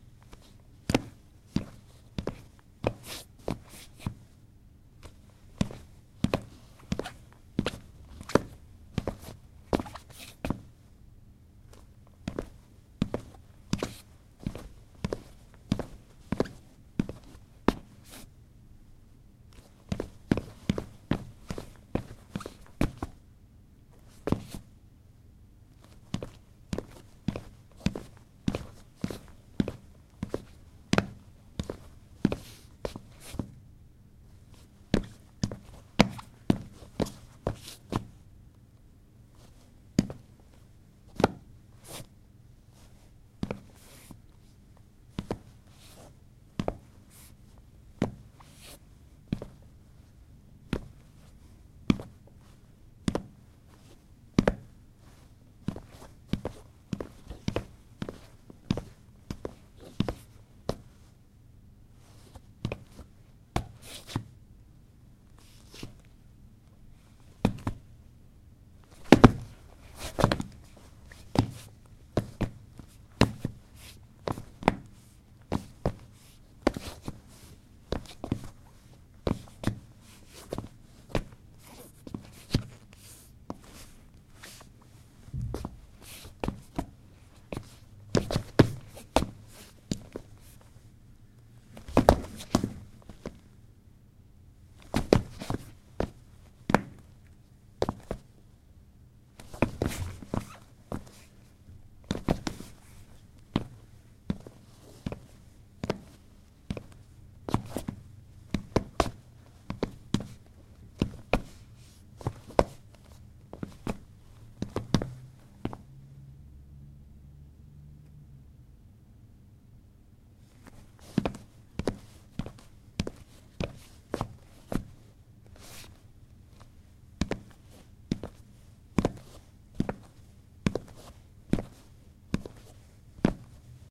sfx turnschuhe auf parkett 02
Walking on wooden floor with sport shoes
running, steps, walking